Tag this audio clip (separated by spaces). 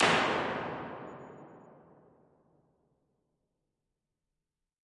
Impulse IR Plate Response Reverb